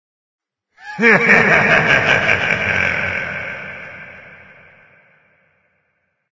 Free laughing monster or game boss to use in your game.
I made this with my voice.
Attention!